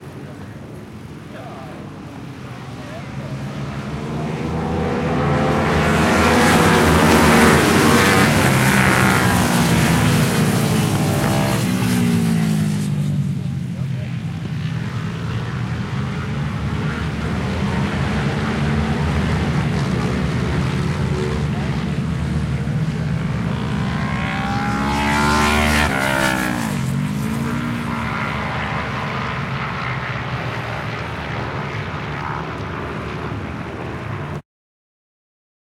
Motorbike Race - Loud Ride By 01
Recorded at trackside on a Zoom H4N at the Anglesey Race Circuit, North Wales.
Drive, Speed, Outdoors, Racing, Atmosphere, Field-Recording, Race, Motorbikes, Engine, Bikes, Sport, Noise